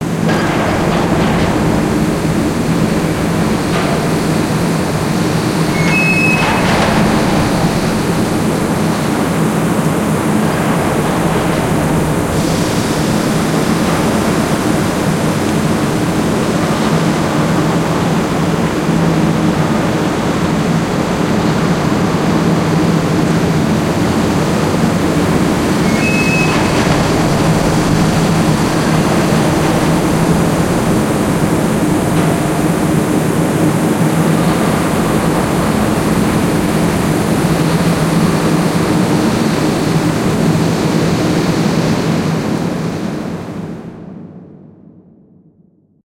artificial soundscape of the interior of a sinking ocean liner - neutral background